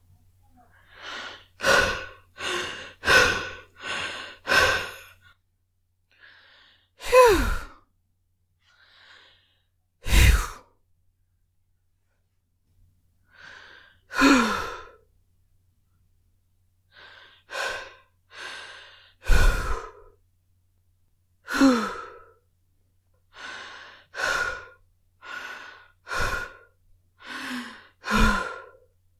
huff and puff
needed some tired huffing and puffing for a video project
exercise, exhausted, panting, tired, breath, puff, breathing, huff, heaving, heavy, exhale, breathe